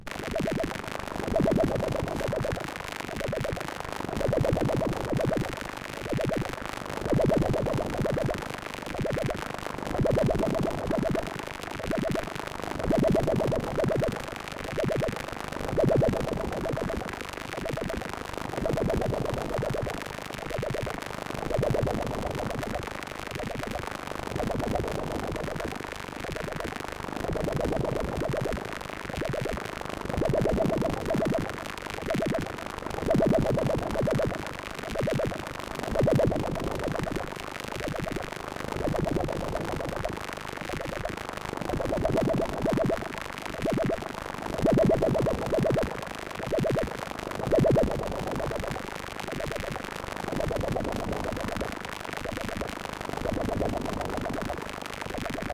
synthetic, cricket-like sounds/atmo made with my reaktor-ensemble "RmCricket"